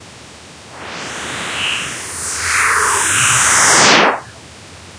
demon self
And audio file made from an Image of my face.
Evil, Horror, Scary